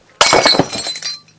Breaking Glass 17
Includes some background noise of wind. Recorded with a black Sony IC voice recorder.
break, breaking, crack, crash, glass, glasses, pottery, shards, shatter, smash, splintering